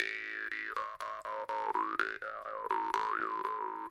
Vargan PTD loop 004
Vargan -> Oktava MKE-15 -> PERATRONIKA MAB-2013 -> Echo MIA midi.The timbre of the tool is lowered.
khomus, vargan, jaw, harp